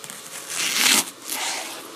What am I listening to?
prei in lengte snijden
Chopping a leek in longitude way on a synthetic chopping board. Recorded with an iPhone 6.
cooking, vegetables, cut, kitchen, chopping, leek